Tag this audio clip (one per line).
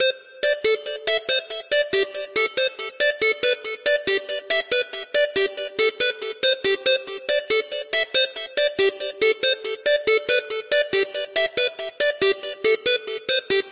140-bpm
bass
bassline
beat
distorted
distortion
drum
drumloop
flange
hard
melody
pad
phase
progression
sequence
strings
synth
techno
trance